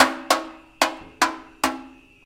Recording of a metal trash can being hit my metal object